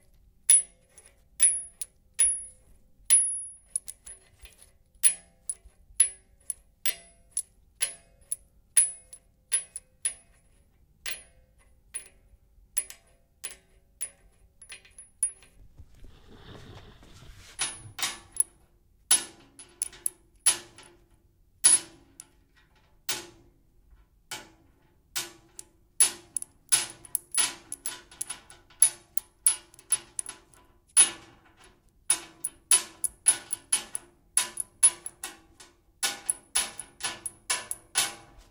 handling some small metal pieces on top of a metal workbench.